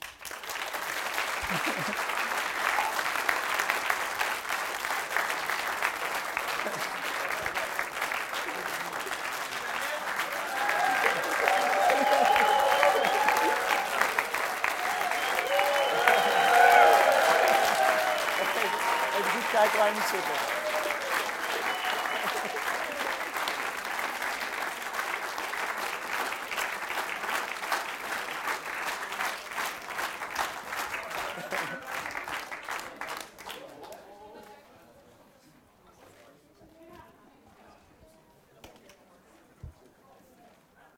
people gathered and applauding